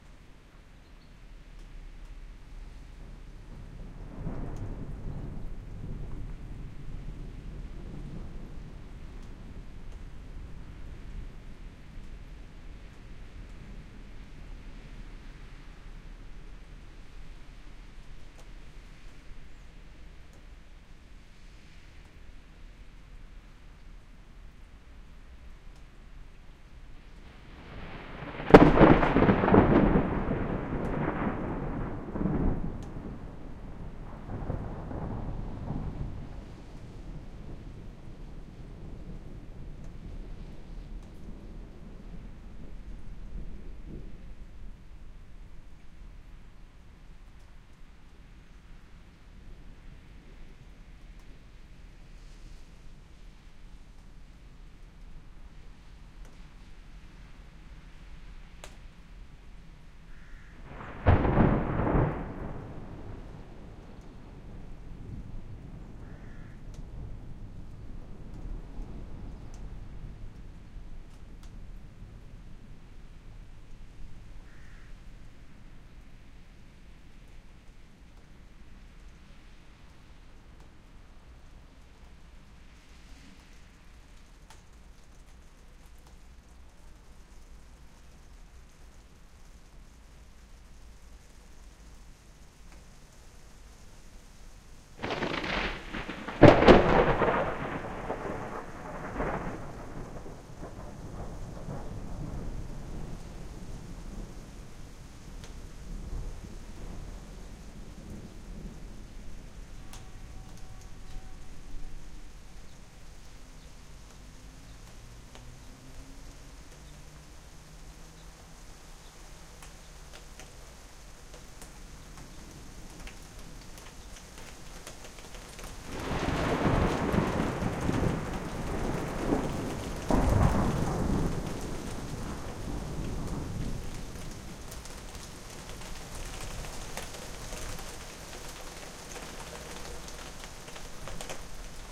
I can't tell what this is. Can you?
THREE MIGHTY THUNDERS IN ROW 20\06\01
Recorded in the beginning of summer, 3 mighty thunders in a row.
Set-up: LCT440pure (A-B stereo on stereo-bar) - MixPre-D - Tascam DR100mk3